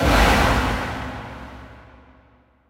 fm missile sound